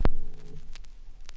Vinyl Needle Drop 01
The needle being dropped mechanically onto a vinyl record.
record, retro, turntable